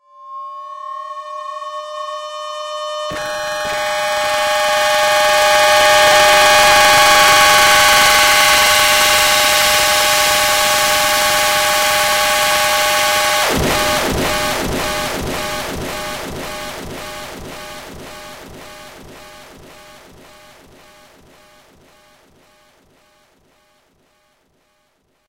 dub siren 6 1
A strong siren effect.
synthesized, fx, rasta, alarm, space, effect, siren, dub, scifi, reverb, synthedit, reggae